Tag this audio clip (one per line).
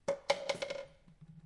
field-recording
misfortune
university